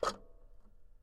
Toy records#99-K04
Complete Toy Piano samples.
Key press or release sounds.